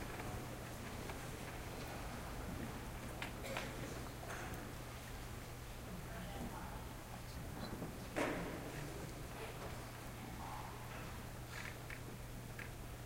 Concert Hall Static Ambiance 1
This recording was taken during a performance at the Colorado Symphony on January 28th (2017). Recorded with a black Sony IC voice recorder.
quiet, static